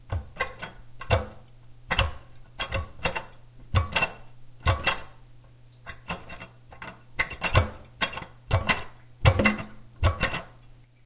Fiddling with the toilet's handle.